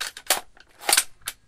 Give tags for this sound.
airsoft aug click gun load magazine metal reload rifle

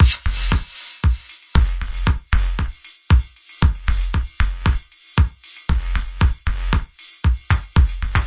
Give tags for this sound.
electronic trance dance

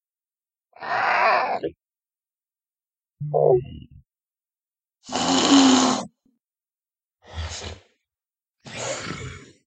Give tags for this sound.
monster hissing crocodile lizard dinosaur hiss animal dragon growl roar beast gargle creature

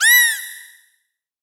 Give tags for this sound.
animal sfx sound-effect vocalization

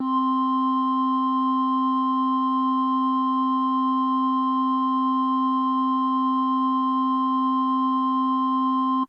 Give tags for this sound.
80s Casio HZ-600 preset sample synth

